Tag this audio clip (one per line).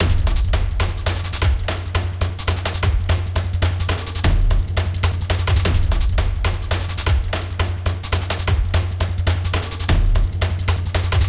Drum
Loop